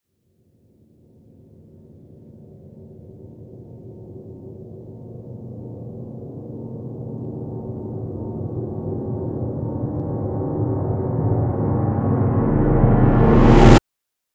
Dark Piano Tension
Reversed recording of hitting the low piano keys.
Dark horror scene or flashback building up to a particular moment before release.
Recorded stereo with a Zoom H4n.
approach, aptmosphere, build-up, buildup, cinematic, creepy, dark, daunting, drama, eerie, fear, flashback, hit, horror, murder, piano, reverse, reversed, scary, shock, stab, suspense, tension